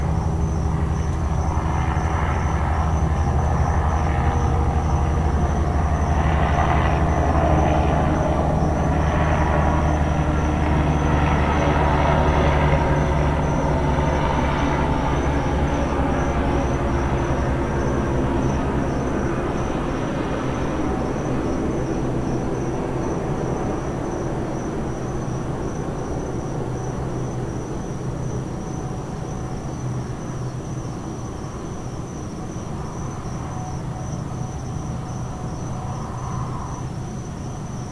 Helicopter outside on the balcony recorded with laptop and USB microphone in the bedroom.
bedroom, noises, foley